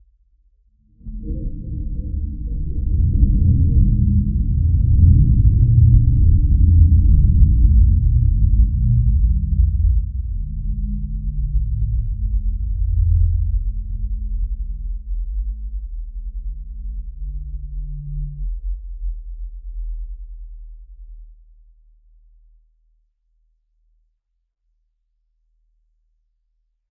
a stone sample (see the stone_on_stone sample pack) processed in SPEAR by prolonging, shifting, transforming the pitch and duplicating the partials